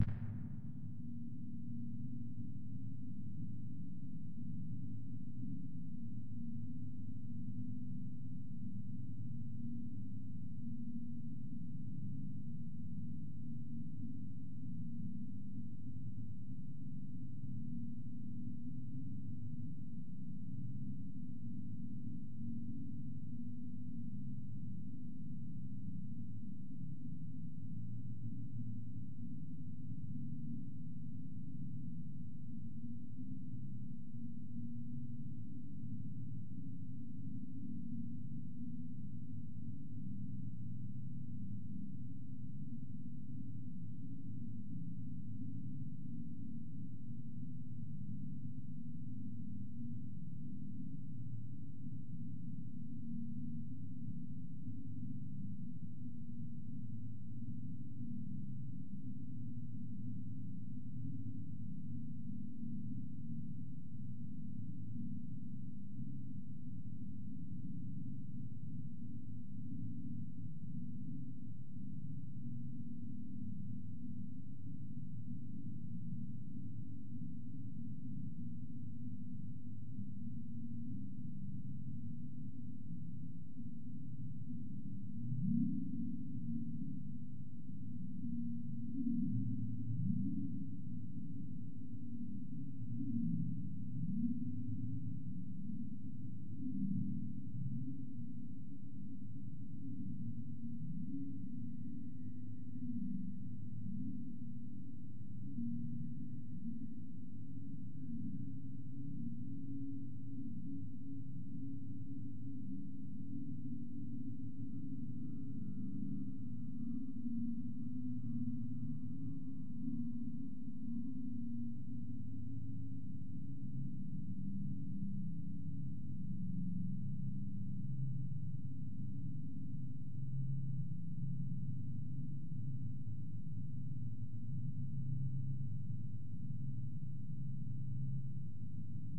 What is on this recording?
14. we're alone here (roomtone)
Two characters, hiding from something.
A dark enclosed space where they have to spend the night. A cave, an empty house, a panic room.
This sound is part of the Weird Roomtones and Silences soundpack - a compilation of synthetic ambiences and silences meant to enhance a neutral atmosphere in the desired direction. The filenames usually describe an imaginary situation that I imagine would need the particular roomtone, hardly influenced by movies I've watched.
------You can use the soundpack as you wish, but I'd be happy to hear your feedback. In particular - how did you use the sound (for example, what kind of scene) and maybe what can be improved.
Thank you in advance!
atmos, ambience, roomtones, haunted, spooky, horror, background, drama, atmosphere, creepy, sinister, phantom, thrill, suspense, roomtone